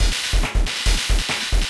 Interesting looking wave form. Most samples reduced down to 4 bits.
fi, Industrial, lo, loop